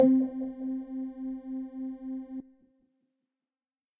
THE REAL VIRUS 09 - SUB BAZZ - C4
Big bass sound, with very short attack and big low end. High frequencies get very thin... All done on my Virus TI. Sequencing done within Cubase 5, audio editing within Wavelab 6.
bass, multisample